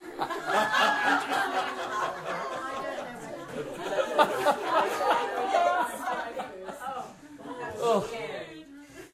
small group
ls5, group, Olympus